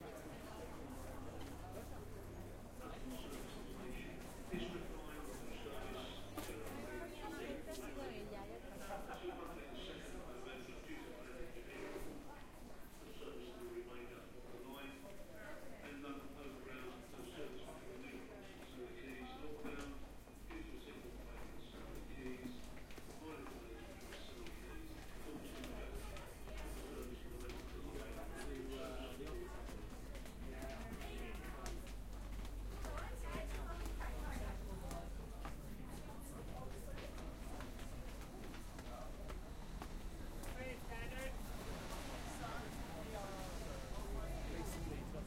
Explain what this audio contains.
Recording of walking through Bond Street Station, London including people etc